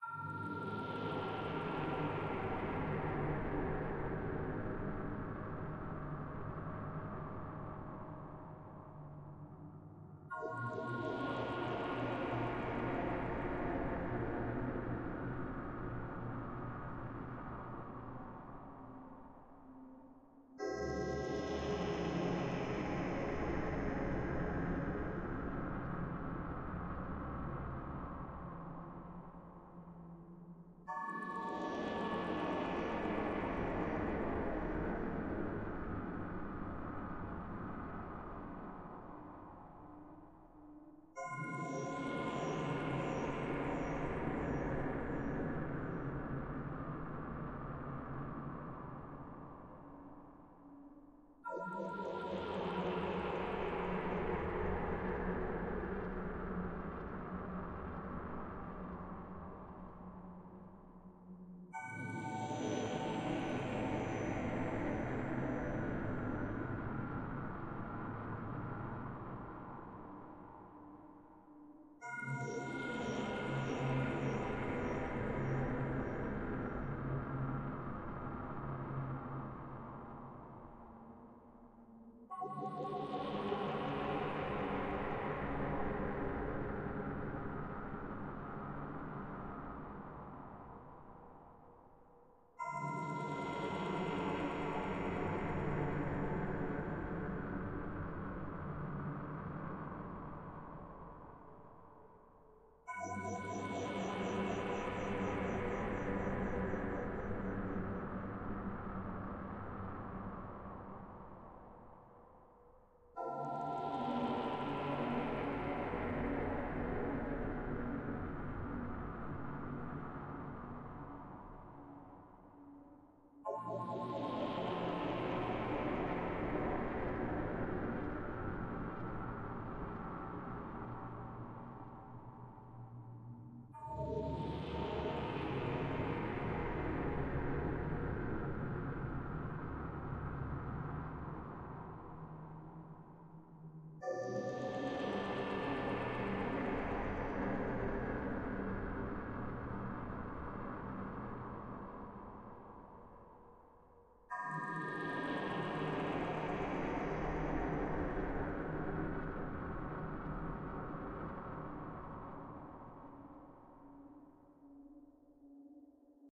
This sound is a third set of dark atonal horror pads / stabs.